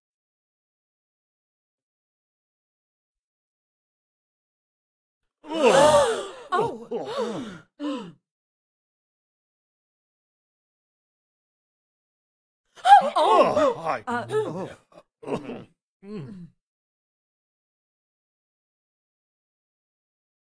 gasps small crowd
A small crowd of 5-6 voices (both male and female) gasping in surprise and alarm. Startled/disgusted/shocked tone. Two separate gasps are included.
alarmed, amazed, breath, crowd, disgusted, female, gasp, gasping, group, horror, inhale, male, scared, shock, shocked, startled, surprise, voice